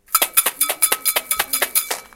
mySounds EBG Leandro
Sounds from objects that are beloved to the participant pupils at the Escola Basica of Gualtar, Portugal. The source of the sounds has to be guessed.
Escola-Basica-Gualtar, mySounds, Portugal